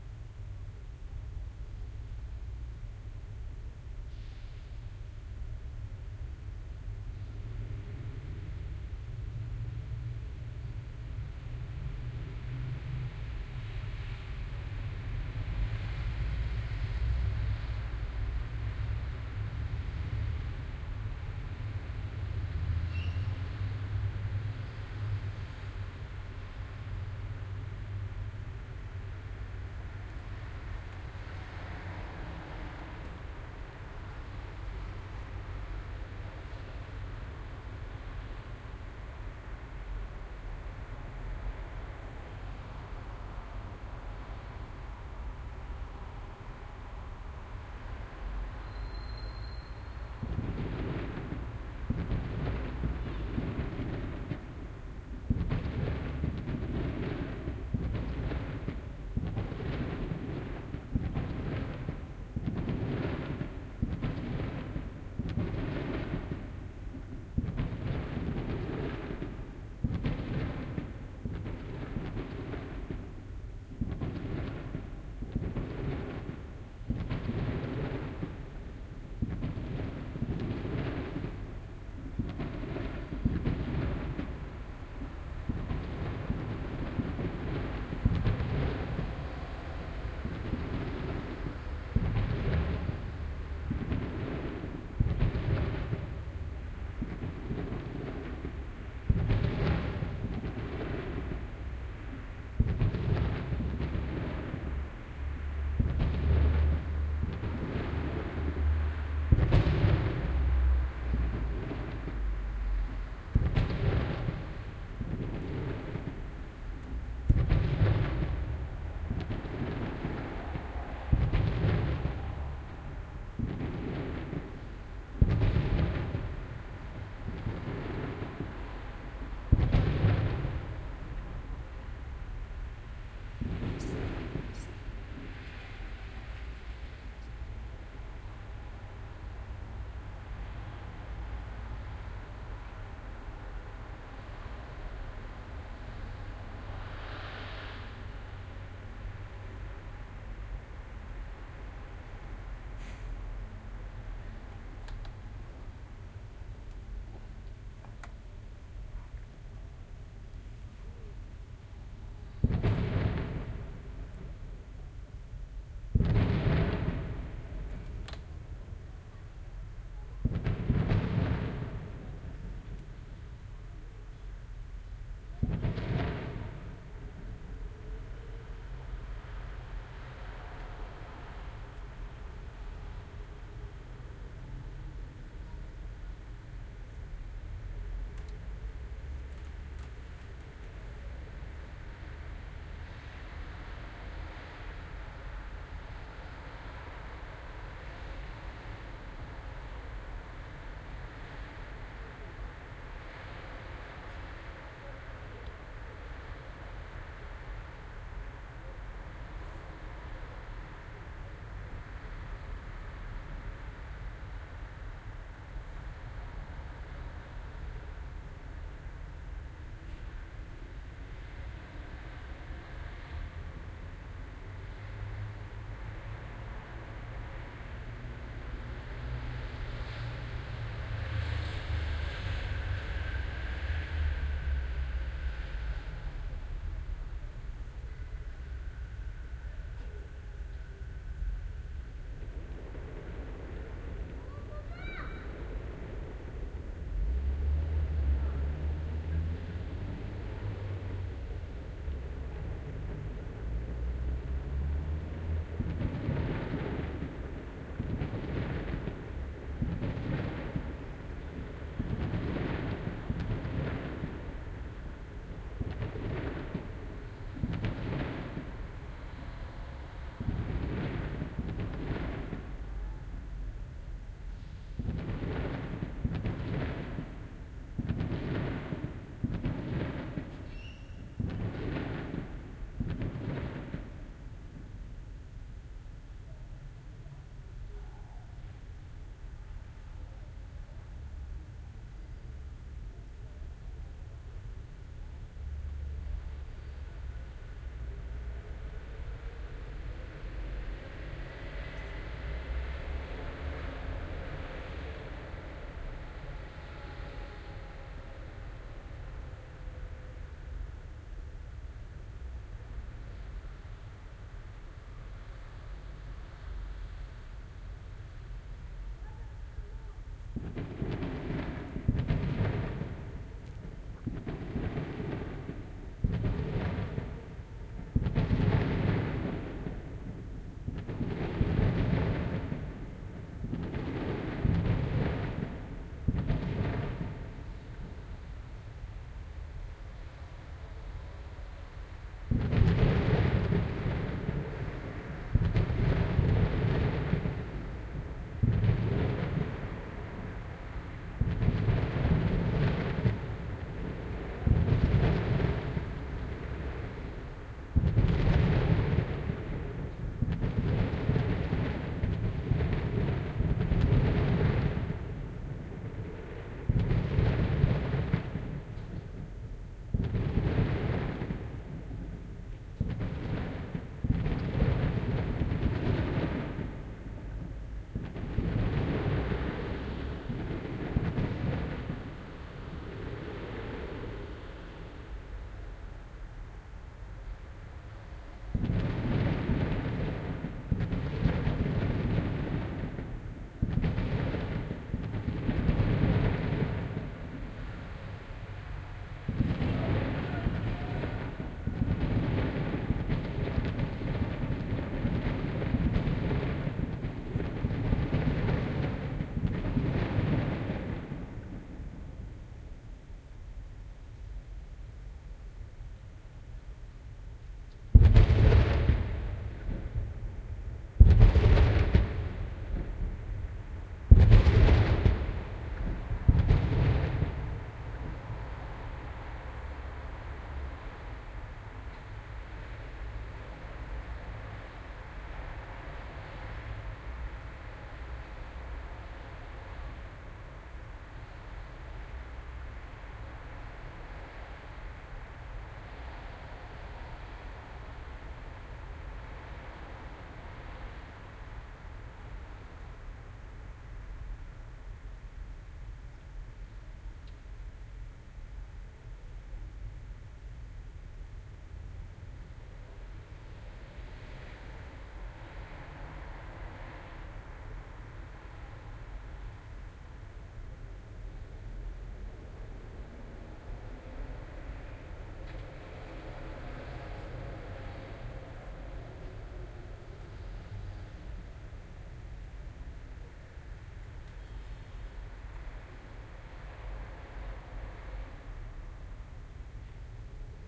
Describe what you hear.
Athletissima meeting fireworks. The Olympic stadium is uptown 2 miles far from my house. The sounds are distant, delayed and naturally reverberated by the central town buildings. Some bats passing by, perhaps a little frightened!